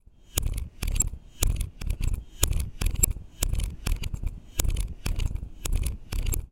handle gear REVERSE
For this, I took my original recording of the ice cream scoop, and used the Reverse feature in Logic to play this sound backwards.
noise, MTC500-M002-s14, backwards, repetition, scoop, squeeze, mechanical, ice-cream, machine, gear, manipulated